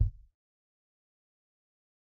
Dirty Tony's Kick Drum Mx 011
This is the Dirty Tony's Kick Drum. He recorded it at Johnny's studio, the only studio with a hole in the wall!
It has been recorded with four mics, and this is the mix of all!
dirty, drum, kick, kit, pack, punk, raw, realistic, tony, tonys